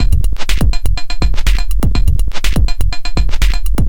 123bpm FX + Bass